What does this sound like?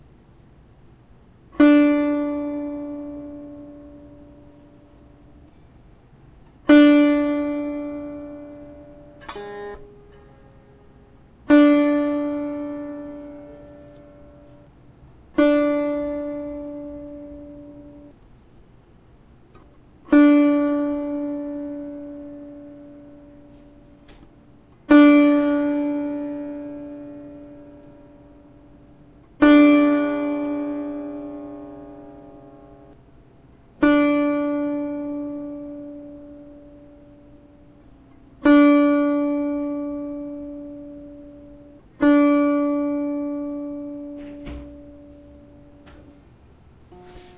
A sitar playing the same note multiple times. Natural , un-processed. This was recorded on a Zoom H4.